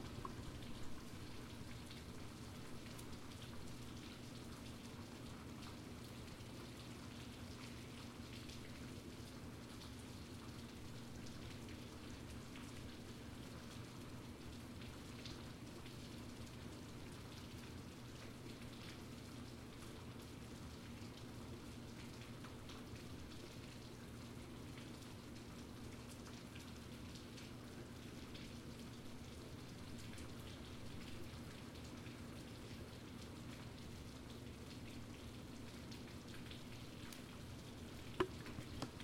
INT RainOutside
Rainfall ambient from the middle of my living room using Zoom H4n onboard mic.